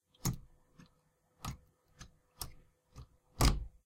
The sound of a plug being pulled out of an outlet.